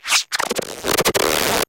an angry synthesized dog and cat going at it.
TwEak the Mods
leftfield, acid, bass, electro, alesis, base, glitch, small, beats, chords, micron, synth, ambient, kat, thumb, idm